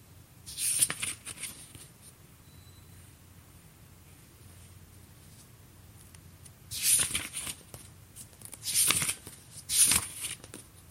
turn pages
book, pages